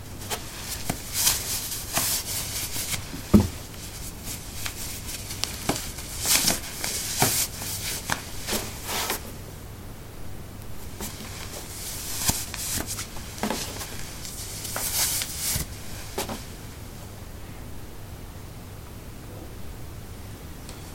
wood 02d socks onoff
Putting socks on/off on a wooden floor. Recorded with a ZOOM H2 in a basement of a house: a large wooden table placed on a carpet over concrete. Normalized with Audacity.
step, footstep, footsteps, steps